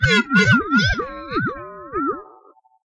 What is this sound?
A wobbly robot noise. Could double as a satellite or a strange machine noise.
weird, space, robot, computer, wobbly, laser, scifi, electric, machine, sci-fi, electronic, future